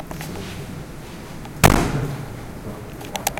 Sui-Chen-slam
SLAM 0.3meters away from recording location recorded using R-09
bang, smacker, slam, smack